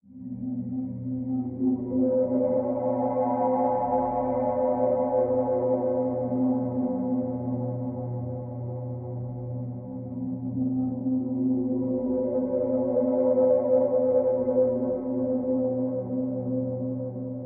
ab merge atmos
a evolving sweepy pad with suspense
horror, pad, drone, evolving